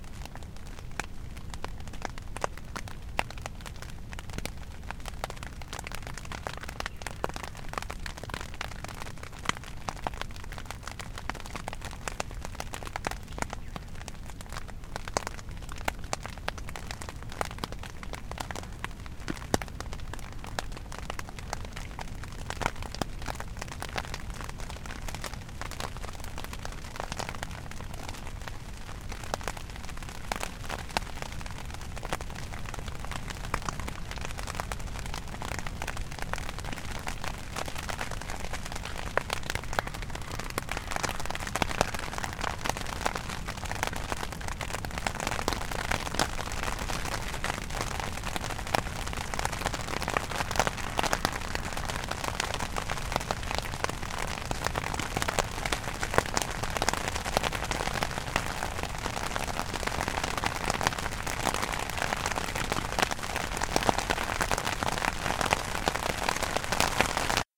Rain on tent
Starting to rain on Macpac Olympus tent by the shore of Lake King William, Tasmania, 27 Feb 2014, 9.19am. Recorded from inside the tent on a Marantz PMD 661 using a Rode NT55.
camping, Raindrops, tent